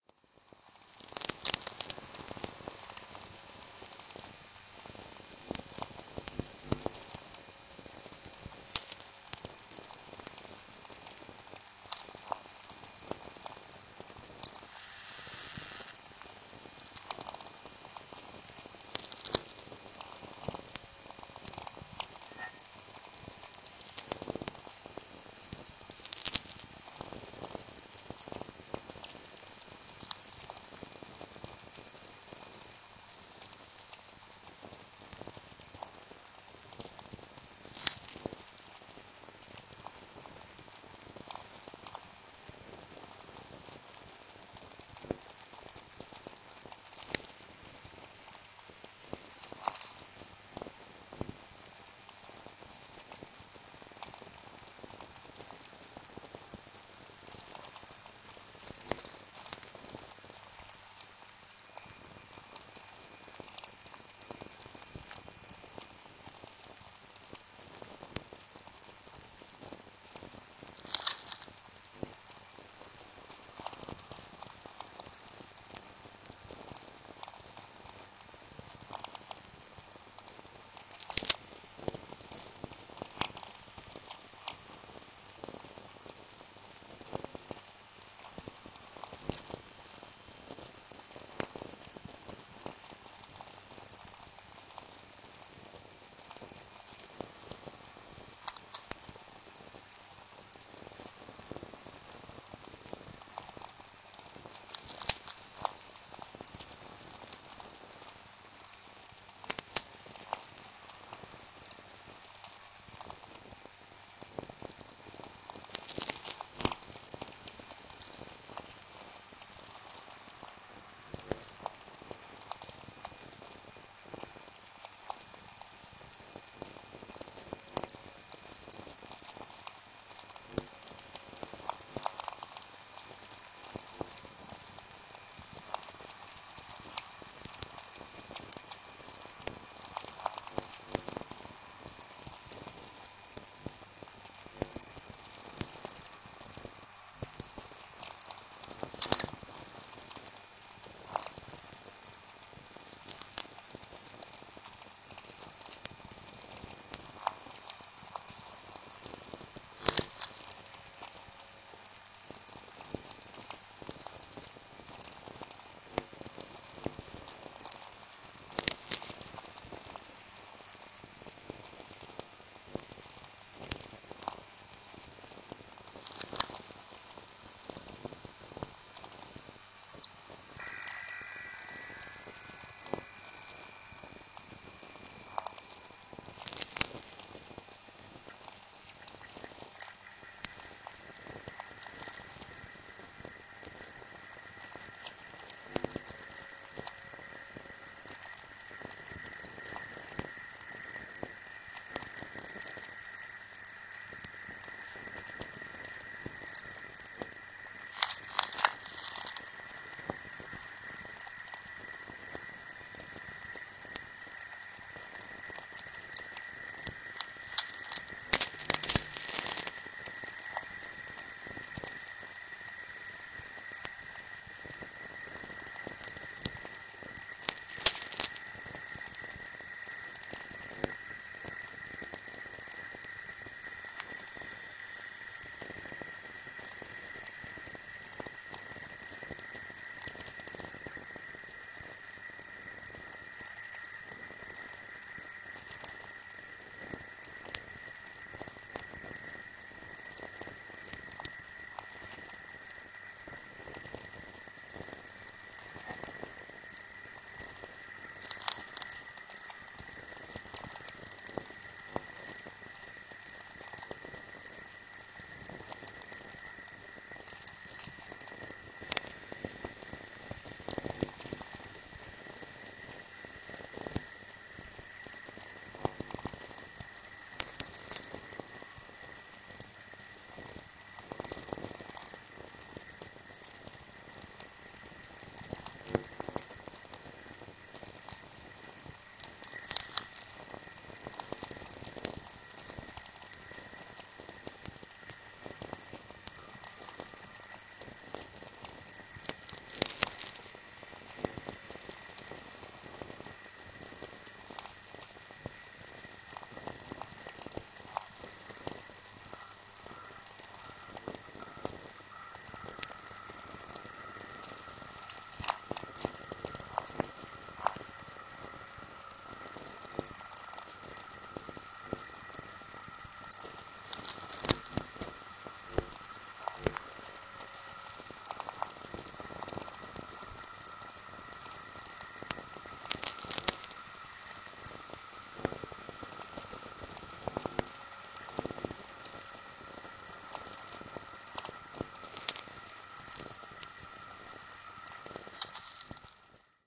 Although the project Inspire (NASA) seems to have died, there are still sources of sound sources of VLF noise in the Net.
In this package, I simply offer a few records scattered in time from this site. The stereo samples correspond to a experimental stream that combines two sources balanced on each channel: Cumiana VLF Receiver (Italy) + Sheffield VLF Receiver (UK). The mono tracks correspond to other undetermined receivers.